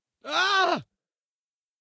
The sound of me screaming.